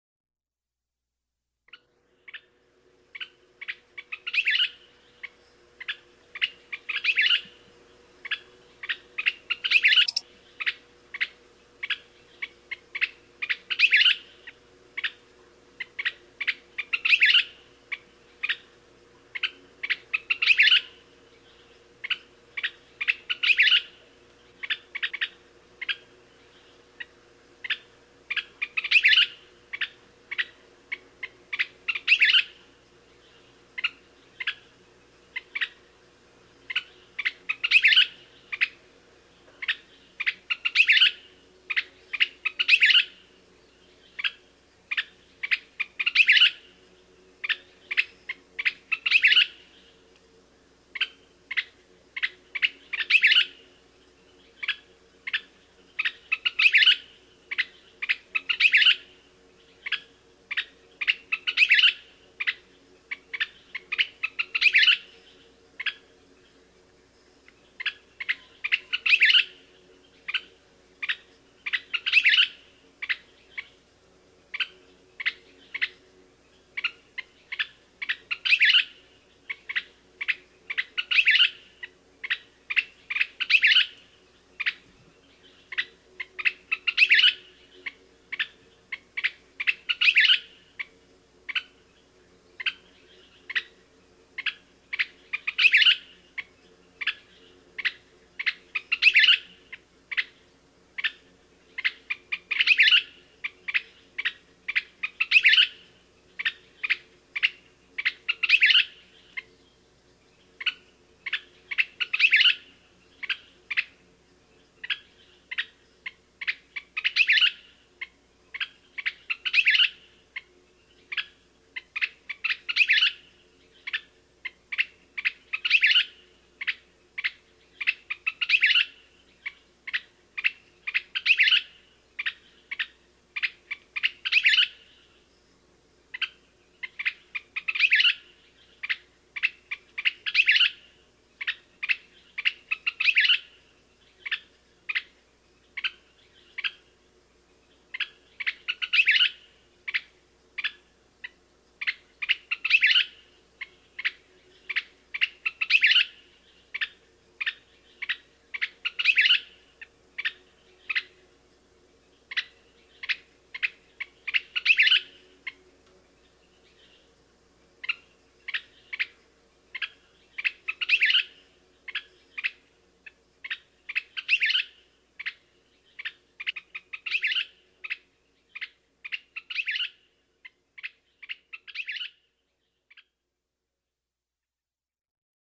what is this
Sherman Western Kingbird 24jun2005
Recorded June 24th, 2005.